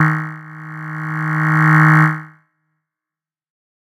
This is one of a multisapled pack.
The samples are every semitone for 2 octaves.
swell, pad, noise, tech